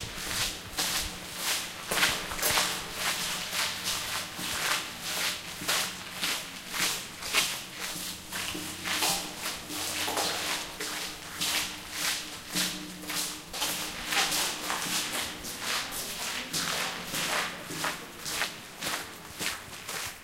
My wife and I walking through a tunnel built for pedestrians at Big Sur Pfeiffer Beach Park.
big-sur, california, national-park, steps, tunnel, walking